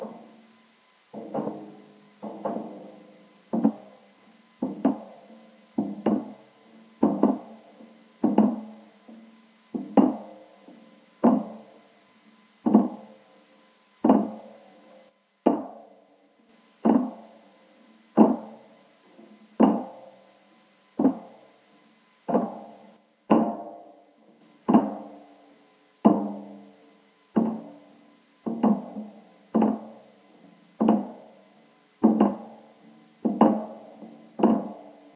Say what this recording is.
By request of M-RED, an alternate Foley recording of someone walking on metal stairs or just any metal surface. It's really a metal meshed crate. It provided some good reverb, but I added just a TINY amount after recording it. Instead of just hitting it, I took a shoe and dropped it lightly on the crate. The samples turned out pretty good. I'm happy with them. It makes me want to do more Foley recordings! They're fun.